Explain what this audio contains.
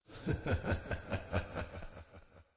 Tunnel laugh
Recording of a laugh with reverb and a somewhat demonic feel to it.
creepy, echo, evil, horror, laugh, scary, tunnel